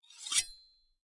Drawing dagger from scabbard
A fish knife being drawn from a wooden knife block, which sounds kind of like a knife or dagger or short sword being drawn from its sheath.
sheath, blade, Sword, swords, medieval, metal, scabbard, knife